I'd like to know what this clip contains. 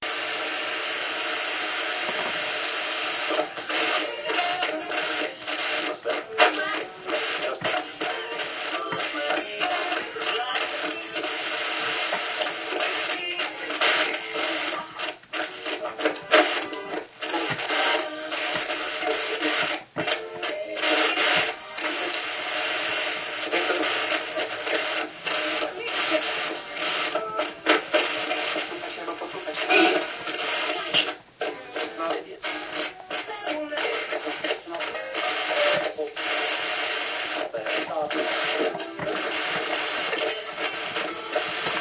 Tunning Radio
This recording contains sounds typical for tunning a radio as a noise voices...